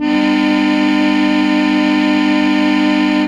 An old brown plastic Wind Organ (?)-you plug it in, and a fan blows the reeds-these are samples of the button chords-somewhat concertina like. Recorded quickly with Sure sm81 condenser thru HB tube pre into MOTU/Digi Perf setup. G Major.
electric, organ, plastic, wind